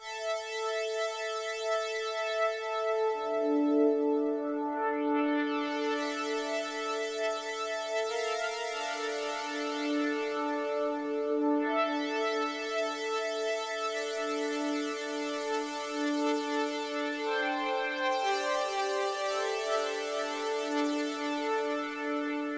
High Drone 001
High synth drone pad
drone, electronica, high, pad, synth